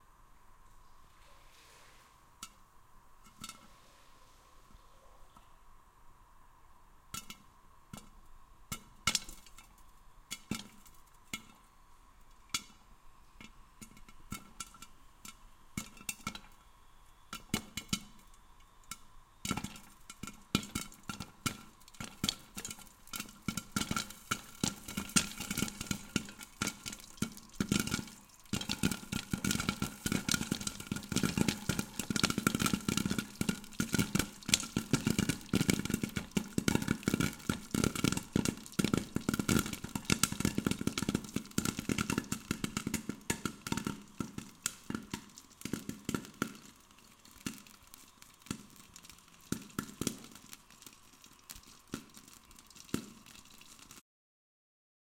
popcorn popping on a gas stove